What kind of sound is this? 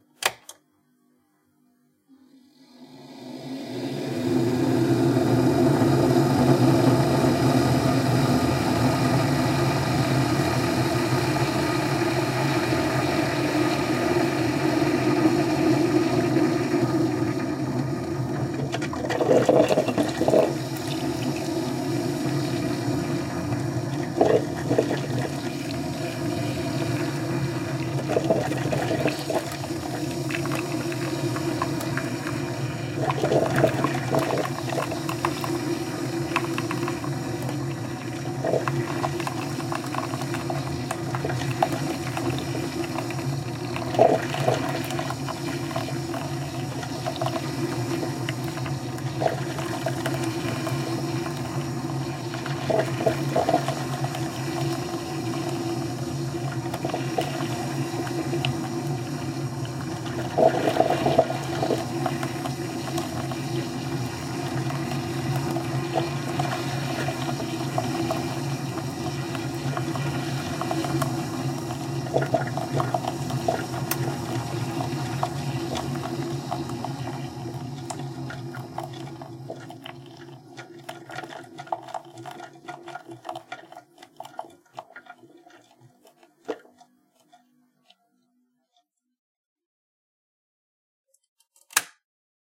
Brewing coffee at home. Recorded with a Zoom H5.